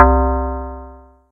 FM1-FMBell 03
This sound was created using Frequency Modulation techniques in Thor (a synth in the Reason DAW).